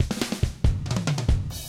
Simple 1 bar rock break